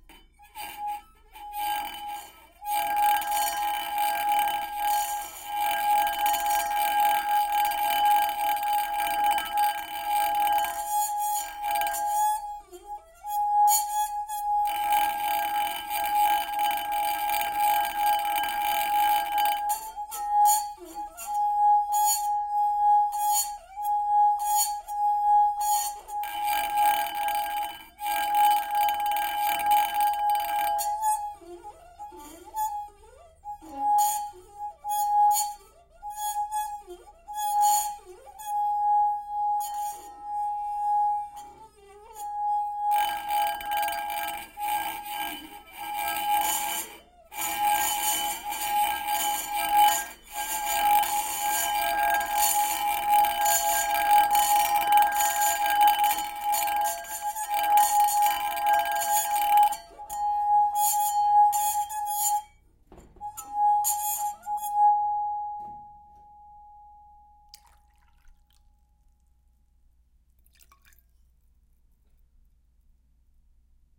crystal glass tones 1
Setup three crystal glasses all adjacent to one another. vibrated the middle glass allowing it to rattle against the two next to it. The surface used for the glasses to sit upon was a metal sheet. gear: iKey plus and panasonic MM-BSM-7.